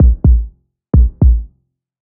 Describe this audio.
KB Heartbeat
Simple heartbeat.
All kind of sounds.